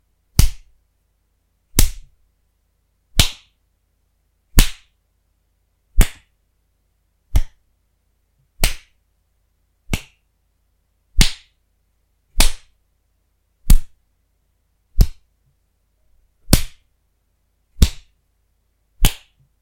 Couple of punches for ya! Made with punching my left hand with my right